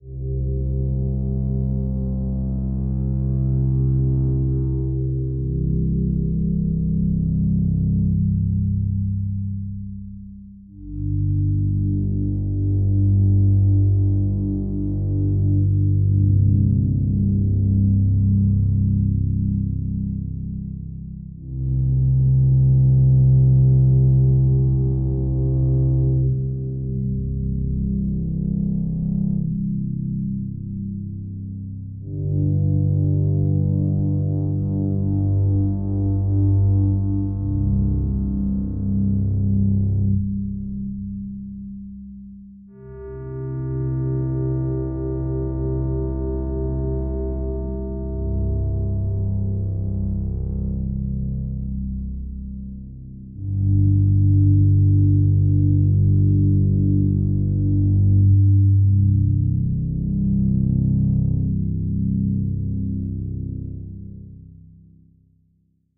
ibrkr01 drone
Part 3 of 5. 90-bpm low frequency drone.
This piece is meant to underscore 3 repetitions of the ibrkr01_arp loop sequence.
Created with Wollo drone VSTi inside SLStudio 11.04.
90-bpm, Ambient, Drone, Synth